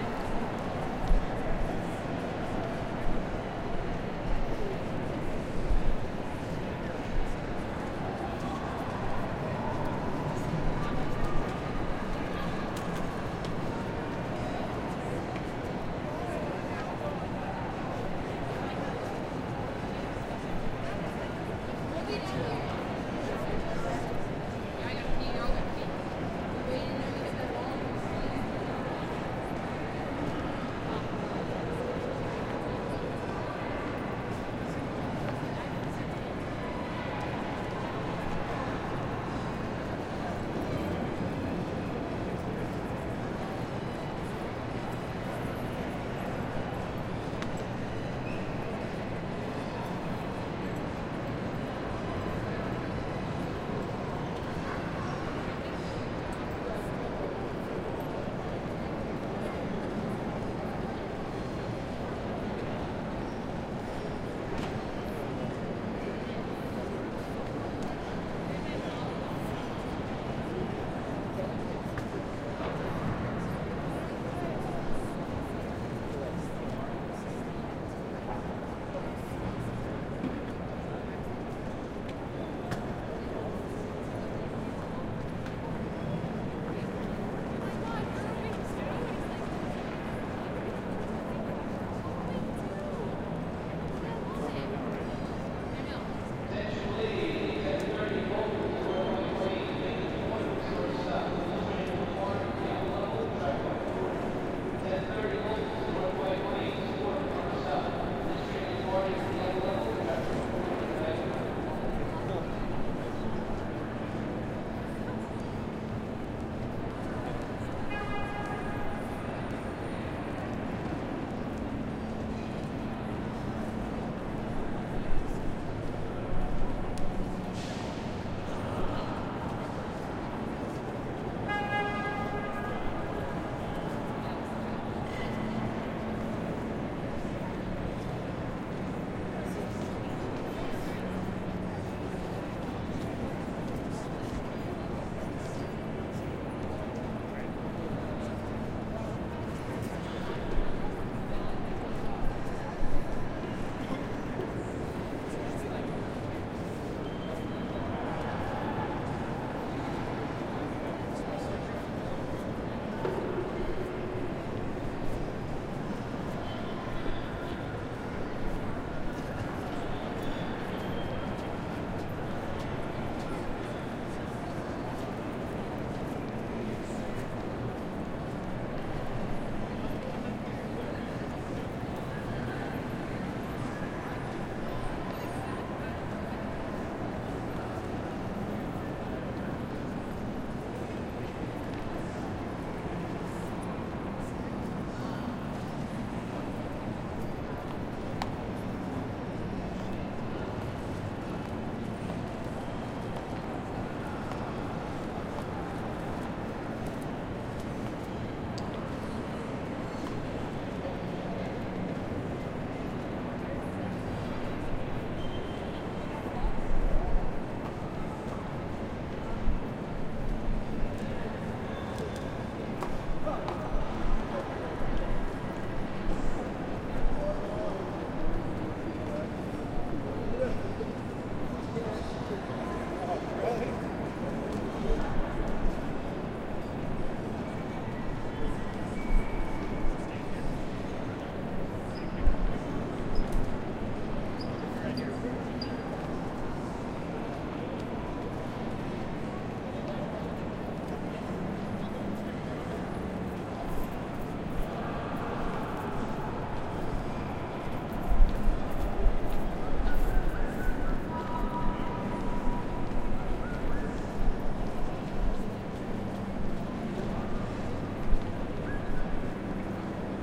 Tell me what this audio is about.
I made this recording standing on a landing overlooking the great hall of Grand Central Terminal in New York City. You can hear general ambiance, chatter, announcements, and train sounds. This recording was made on the evening of 25 March 2008 with a Zoom H4. Light post-production work done in Peak.